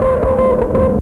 Short, simple, robot sound.
bell, factory, industrial, machine, machinery, mechanical, noise, robot, robotic, short